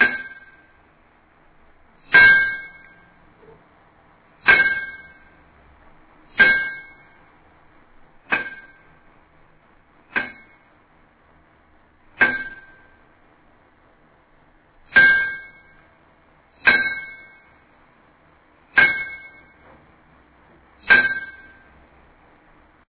Glass hit with Spoon PROCESSED
Glass hit with Spoon.
Exactly, its a blue coca-cola glass